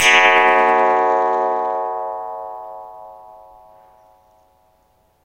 Metal bar suspended and hit with a stone.
long, metallic